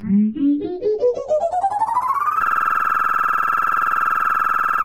Flying Car - Full Start Fly
AUDACITY
For left channel:
- Cut silence before (0.000s to 0.046s), middle (0.096 to 0.228), and after (0.301 to 0.449) sound
- Cut middle part 0.130 to 0.600
- Effect→Change Speed
Speed Multiplier: 0.800
Percent Change: –20.000
- Effect→Equalization
(18 dB; 20 Hz)
(18 dB; 800 Hz)
(–18 dB; 2000 Hz
(–26 dB; 11 000 Hz)
- Effect→Change Speed
Speed Multiplier: 1.700
Percent Change: 70.0000
- Effect→Repeat…
Number of repeats add: 70 (20 for start fly, 50 for fly)
Select repeats 0 to 20 (0.000s - 1.015s)
- Effect→Sliding Time Scale/Pitch Shift
Initial Temp Change: -50%
Final Tempo Change: 0%
Initial Pitch Shift: –50%
Final Pitch Shift: 0%
- Effect→Sliding Time Scale/Pitch Shift
Initial Temp Change: –50%
Final Tempo Change: 0%
Initial Pitch Shift: –50%
Final Pitch Shift: 0%
- Effect→Sliding Time Scale/Pitch Shift
Initial Temp Change: -50%
Final Tempo Change: 0%
Initial Pitch Shift: –50%
Final Pitch Shift: 0%
For right channel:
- Tracks→Add New→Mono Track
- Copy left track and paste at 0.010 s
animation, flying-car, hanna-barbara, jetsons, xe-bay